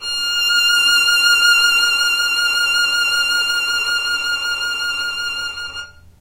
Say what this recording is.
violin arco vib F5
violin arco vibrato
arco, vibrato, violin